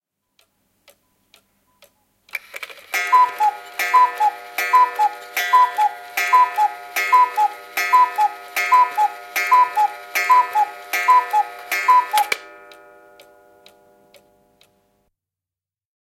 Käkikello kukkuu, lyö / Cuckoo clock strikes 12, both chiming and cuckooing
Käkikello lyö 12. Sekä kukkumiset että kellonlyönnit samanaikaisesti.
Paikka/Place: Suomi / Finland / Nummela
Aika/Date: 1980
Yle, Clock, Finnish-Broadcasting-Company, Mekaaninen, Finland, Chime, Yleisradio, Kello, Soundfx, Cuckoo, Kukkuminen, Strike, Kukkua, Cuckoo-clock, Tehosteet, Cuckooing, Field-recording, Suomi